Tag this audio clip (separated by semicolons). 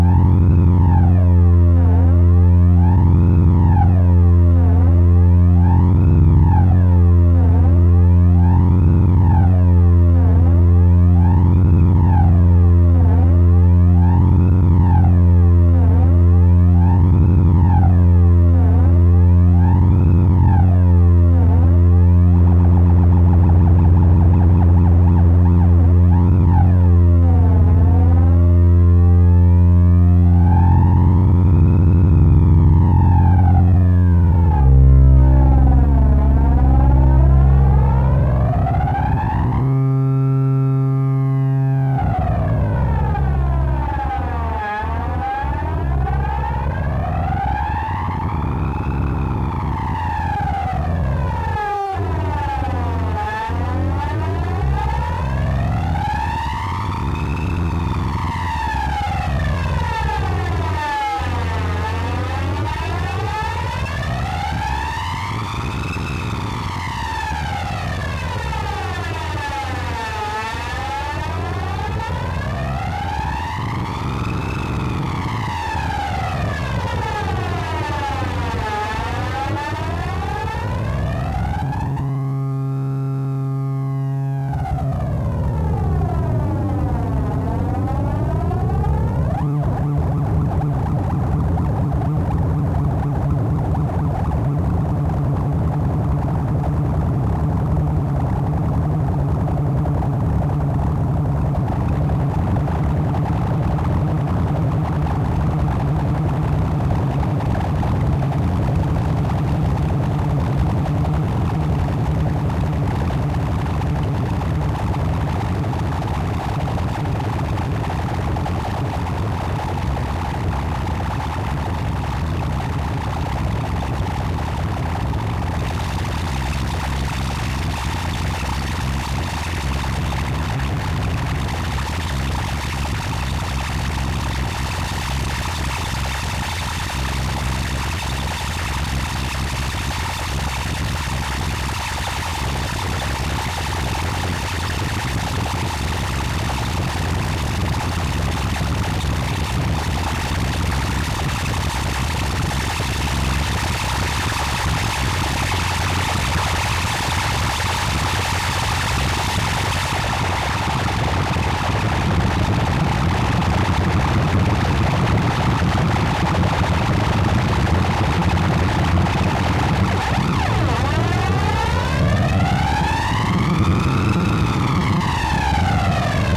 analog; feedback-loop